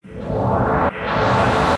sound-design created by heavily processing a field-recording of wind; made with Adobe Audition
sweep, wind, field-recording, ambient, 1-bar, noise, sound-design